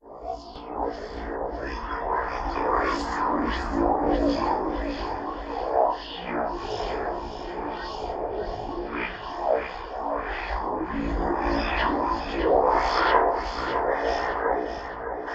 i just messed with some effects on vocals for a song. finally i got this
ghostly, speech, voices